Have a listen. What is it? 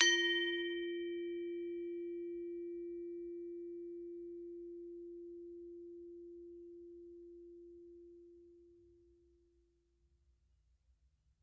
Bwana Kumala Ugal 09
University of North Texas Gamelan Bwana Kumala Ugal recording 9. Recorded in 2006.
gamelan, bali, percussion